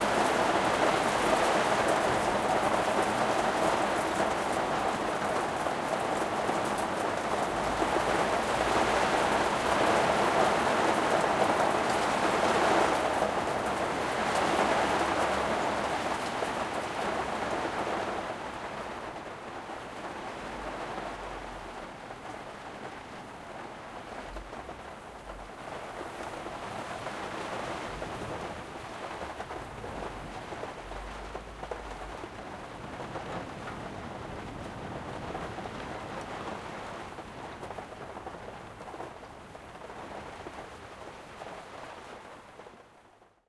pluie-grenier
Rain on the roof of my attic
Pluis sur les tuiles de mon grenier
pluie, storm, attic